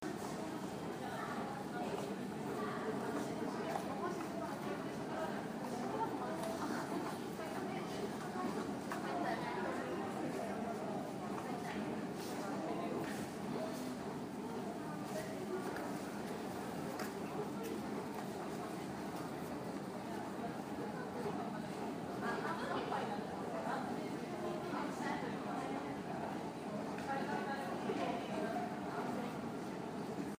Singapore MRT Station
Singapore MRT iPhone.
smrt, singapore, train, station, metro, tube, field-recording, underground, mrt, subway